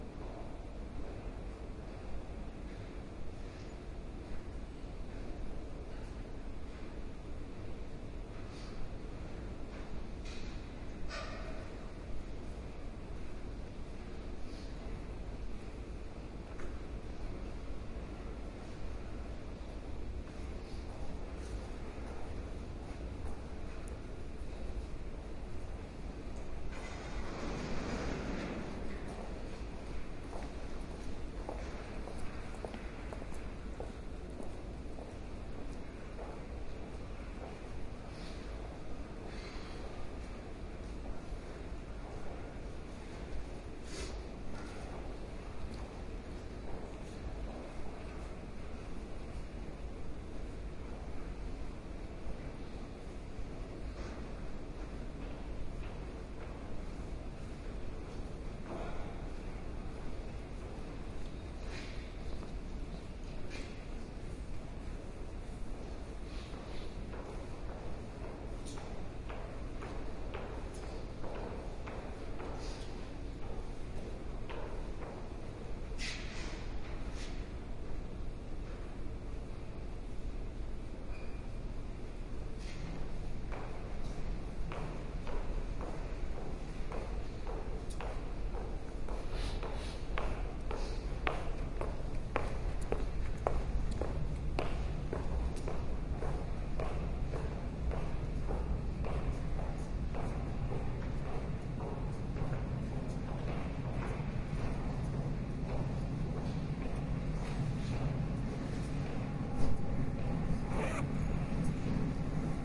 Stereo binaural recording. Sitting on a bench while silent people walk past. Large, echoing room.